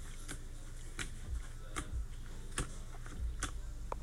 Fish flopping on land